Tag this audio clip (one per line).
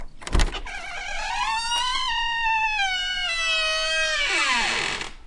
doors
crackle